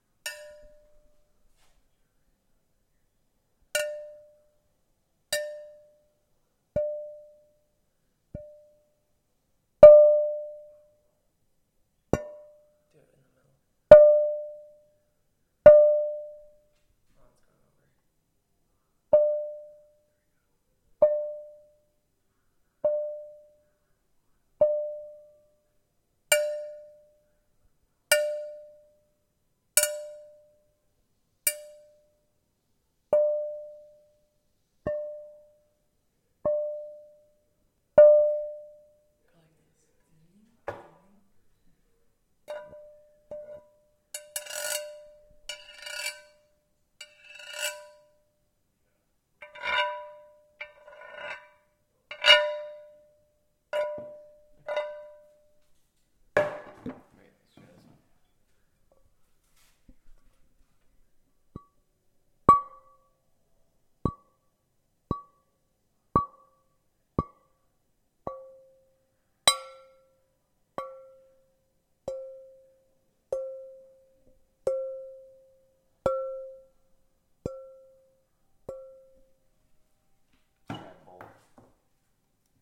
glass hit bowls
This is a glass bowl being tapped, scrapped, and hit.
Bell, Hit, Dong, Bowl, Boing, Ding, Scrap, Donk, Tap